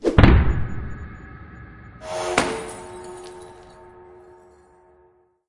Made for this request in Audacity. .aup available here.
Something moves fast and then explodes and a voice gas sound remains. Then some strange machine makes a sound and then glass breaks.
Can be seen in: